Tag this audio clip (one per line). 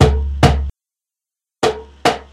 audacity
ceramic
drum
percussion